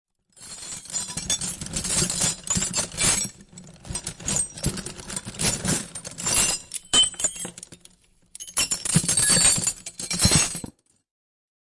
Paisaje-sonoro-uem-Vidrio01
Sonido de fragmentos de vidrio roto
broken-glass, roto, vidrio